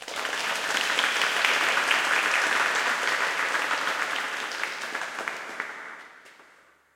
Applause - Crowd - 3
A crowd applauding.
{"fr":"Applaudissements d'une foule - 3","desc":"Une foule applaudissant.","tags":"applaudissements groupe foule"}
audience, clap, applause, fast, group, cheer, clapping